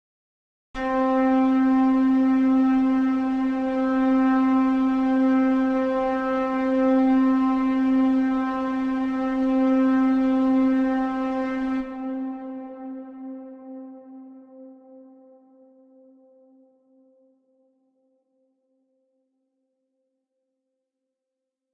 Cello C midi 72
A synthesised cello sound - played and held at midi note 72 C - made in response to a request from user DarkSunlight
electronic, cello, ambience, synth